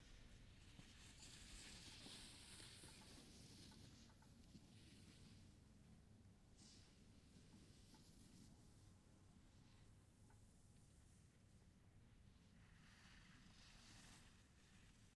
Short recording of a skier on a slope in France, with the rattling of the chairlift in the back. Can almost be used as a loop.